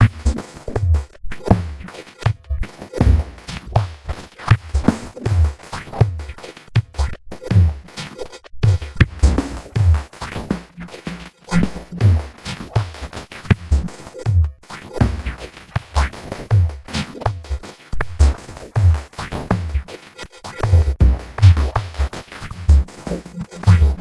80 bpm ATTACK LOOP 2f mastered 16 bit
This is a drumloop at 80 BPM which was created using Cubase SX and the Waldorf Attack VST drumsynth.
I used the acoustic kit preset and modified some of the sounds.
Afterwards I added some compression on some sounds and mangled the
whole loop using the spectumworx plugin. This gave this loop an experimental sound where two different (the most prominent of them being 80 BPM) tempos are mangled together with a resulting lofi sound.
80bpm, disturbed, drumloop, experimental, lofi, spectralised